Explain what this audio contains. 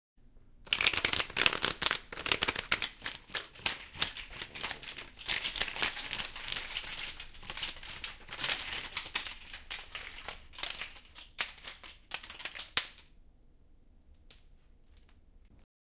cards edit
Composite of shuffling playing cards and flicking the deck in the air. Recorded on minidisk with a Sony ECM-99 stereo microphone.
effect, environmental-sounds-research, human, random, soundeffect